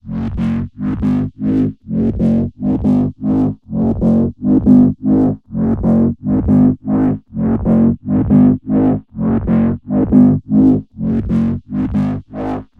bass resample 6
crazy bass sounds for music production